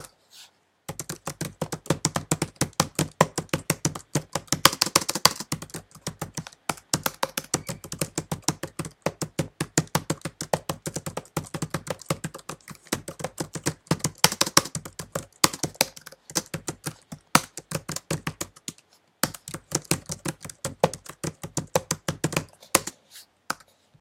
To tap on the keyboard computer (MacbookPro)
mac, keyboard, computer